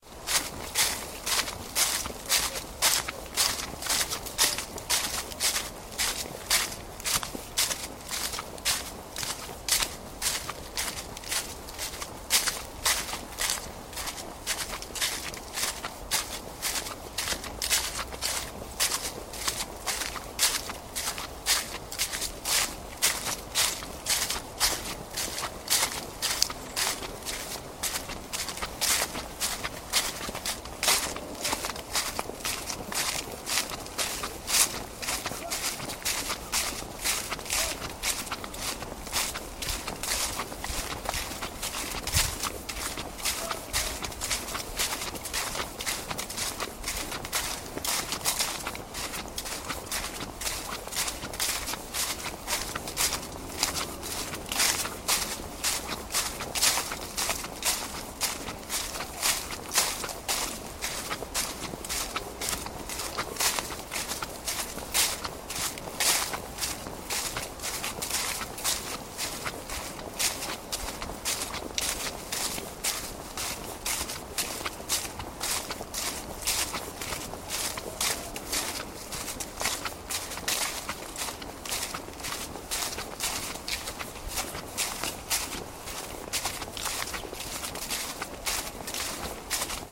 Raw audio of footsteps through dry crunching leaves down a footpath.
An example of how you might credit is by putting this in the description/credits: